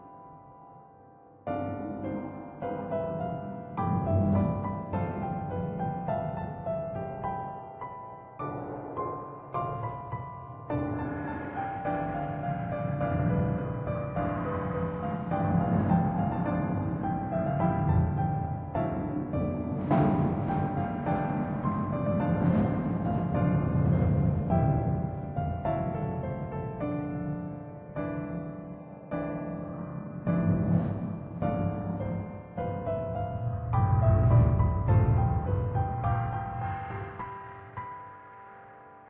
Ghosts play Piano
Sheet music based on spooky and dark tones. From these came the ambient sound installation vision.
Music Sheet AI generated: Payne, Christine. "MuseNet." OpenAI, 25 Apr.
and
I rewrote it
SFX conversion Edited: Adobe + FXs + Mastered
Music